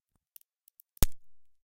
Breaking open a pecan using a metal nutcracker.